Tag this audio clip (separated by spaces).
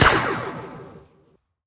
Blaster gun laser star-wars